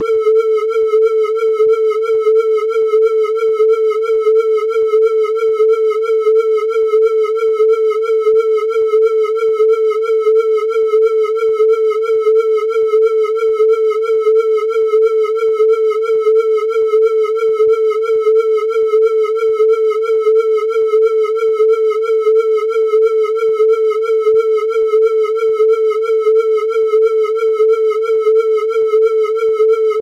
Processing 440 Hz tones generated in Audacity. Used Wah-wah, that's all I can remember. Then, made 2 different channels (L/R)